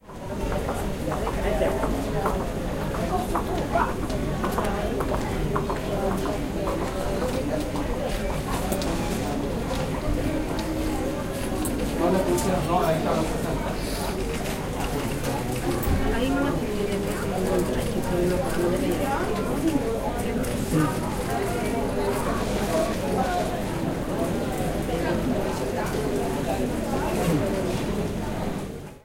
Recorded at a capital airport. One of the biggest airports ! Breeze, movement -- straight departure energy !!
If you enjoyed the sound, please STAR, COMMENT, SPREAD THE WORD!🗣 It really helps!
people, field-recording, voices, trip, chat, ambient, ambience, airport, crowd, atmosphere, terminal, waiting, chatter, travel, conversation